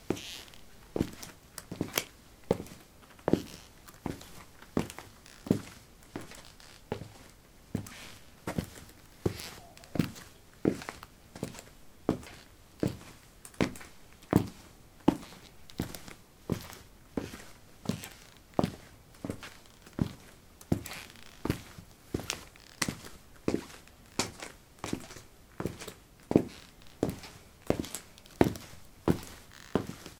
concrete 17a boots walk
Walking on concrete: boots. Recorded with a ZOOM H2 in a basement of a house, normalized with Audacity.